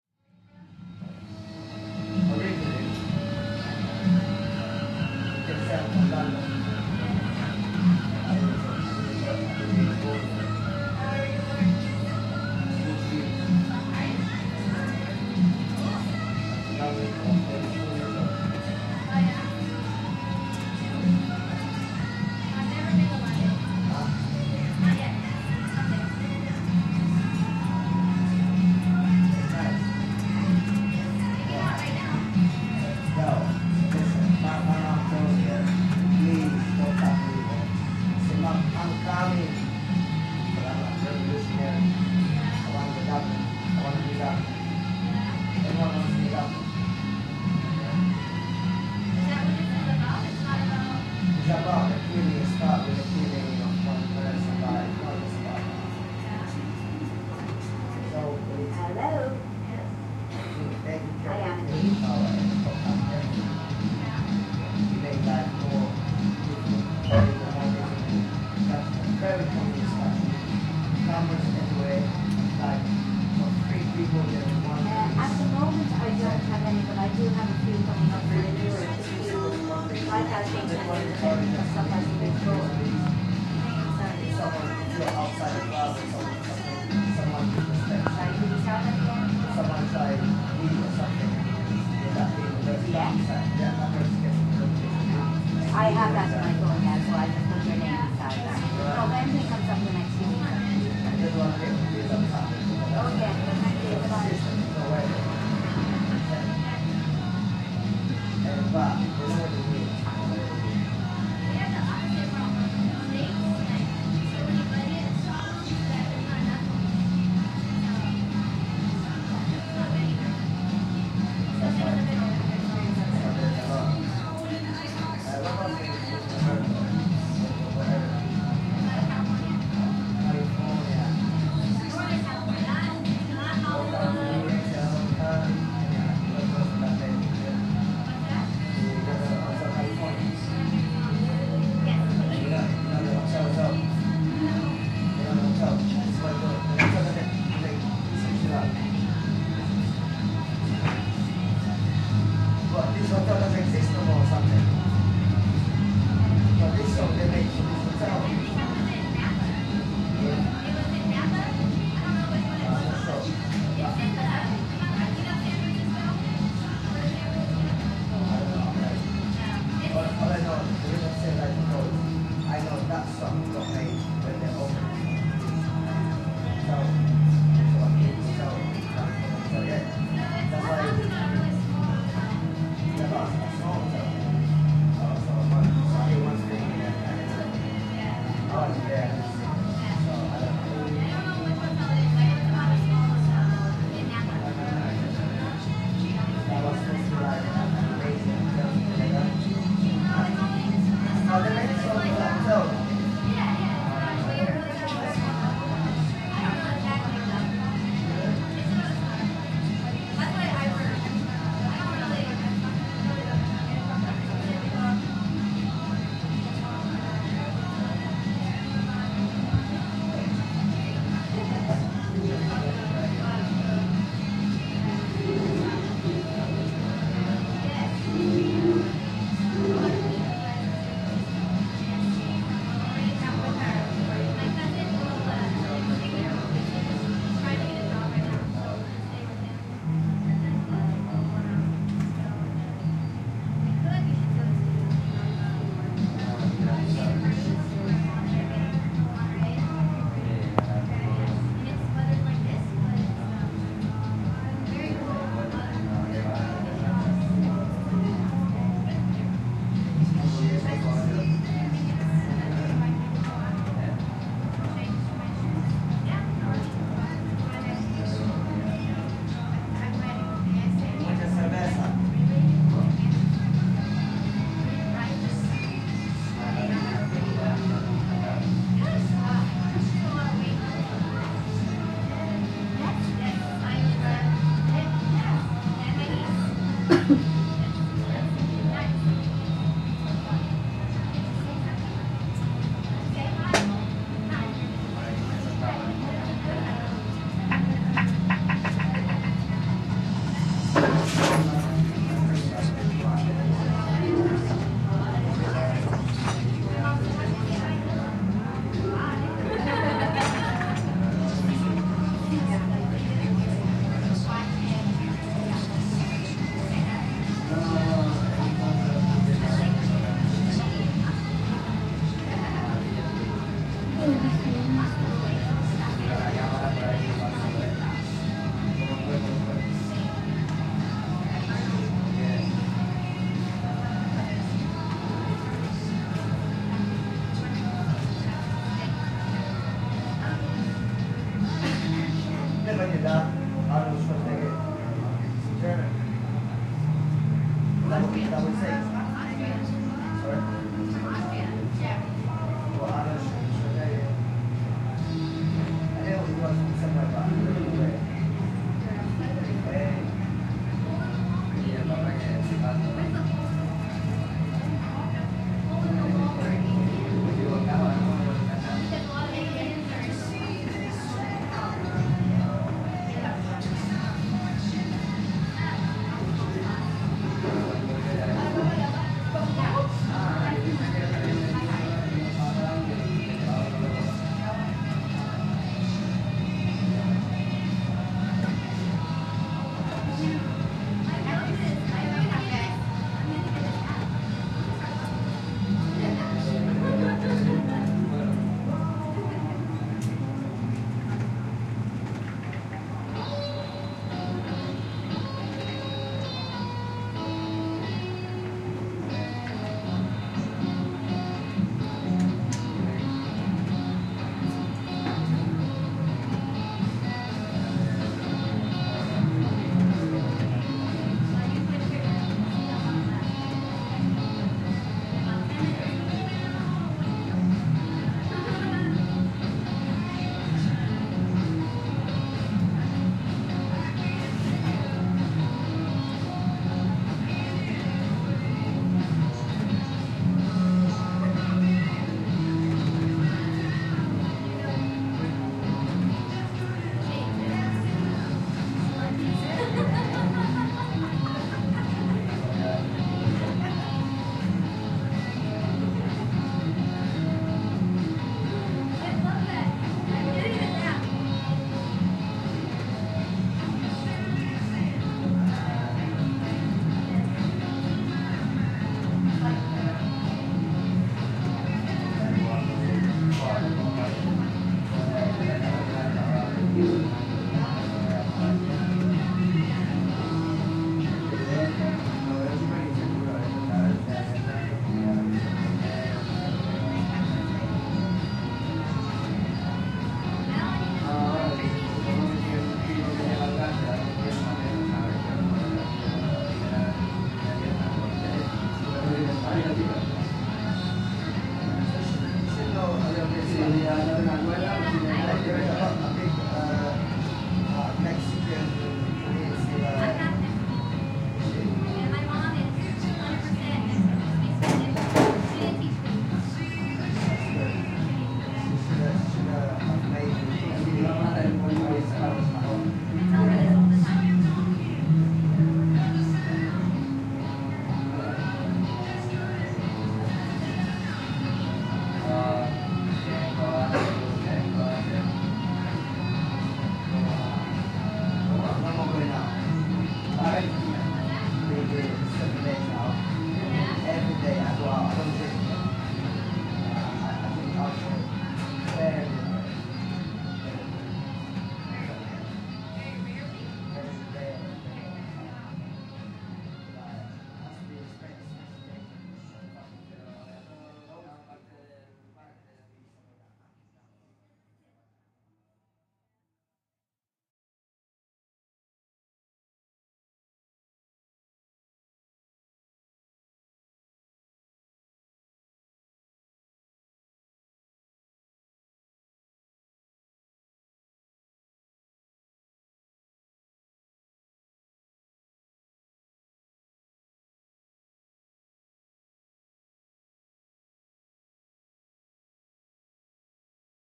date: 2011,11th Aug.
time: 04:00 PM
place: in the Hotel room (Isaac Hotel), recording Pub downstairs
description: Recording of people voices from the window of my hotel room, drinking beer and playing guitar with background of radio music and sound of glasses. You can hear different languages and songs.